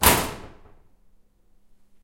Queneau Claque
coup en metal compact
close, metal